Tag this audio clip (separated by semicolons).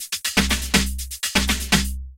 house; loop